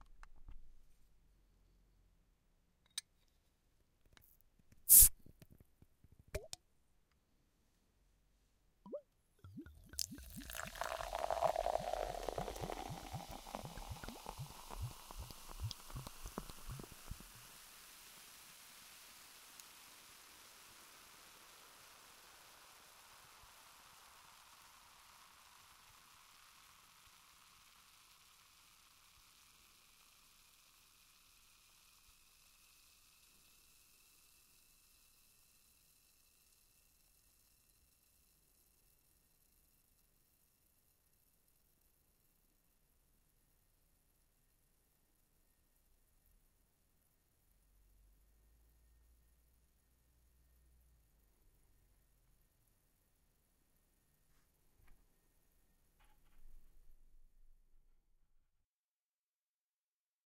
Close-up recording of the bottle-top pop and decanting of a bottle of Hollows and Fentimans Ginger Beer into a tall glass. Recorded with a Sony PCM-D50 using built-in stereo microphones.
drink, opener, glug, field-recording, cider, glass, beer